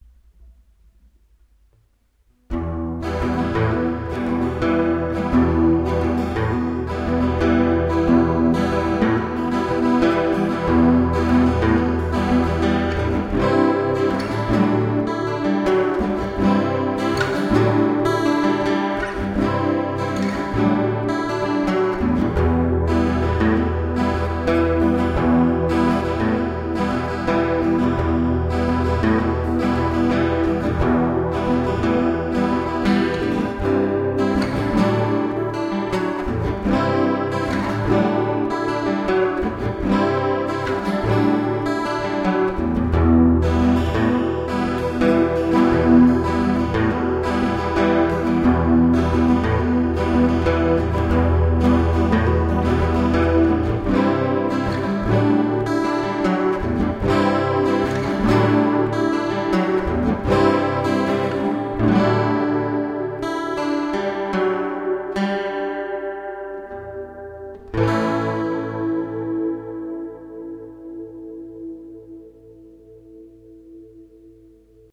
E Minor Unicorn
Acoustic guitar with chorus effect.
experimental, chorus, guitar, instrumental, acoustic, music